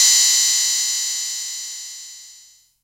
The Future Retro 777 is an analog bassline machine with a nice integrated sequencer. It has flexible routing possibilities and two oscillators, two suboscillators, a noise oscillator, frequency and filter modultion and so on, so it is also possible to experiment and create some drum sounds. Here are some.
analog, fr-777, futureretro, hihat, oh, open